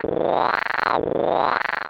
I made this sound in a freeware VSTI (called fauna), and applied a little reverb.
alien, animal, animals, creature, critter, space, synth, synthesized